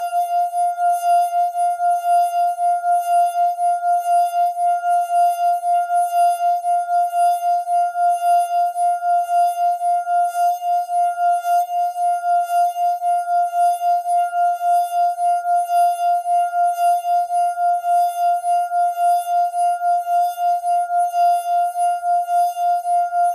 Wine glass, tuned with water, rubbed with wet finger in a circular motion to produce sustained tone. Recorded with Olympus LS-10 (no zoom) in a small reverberating bathroom, edited in Audacity to make a seamless loop. The whole pack intended to be used as a virtual instrument.
Note F5 (Root note C5, 440Hz).